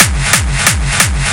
xKicks - Shooting Up Some
Do you enjoy hearing incredible hard dance kicks? Introducing the latest instalment of the xKicks Series! xKicks Edition 2 brings you 250 new, unique hard dance kicks that will keep you wanting more. Tweak them out with EQs, add effects to them, trim them to your liking, share your tweaked xKicks sounds.
Wanna become part of the next xKicks Instalment? Why not send us a message on either Looperman:
on StarDomain:
kick-drum, hardcore, beat, kick, gabber, single-hit, bass, distorted, dirty, hard, distortion, 180